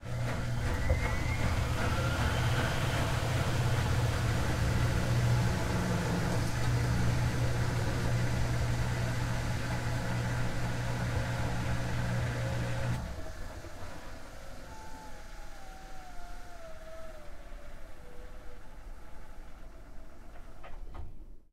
Washing machine and/or drier. Recorded with an ME66.
laundry, machine, washing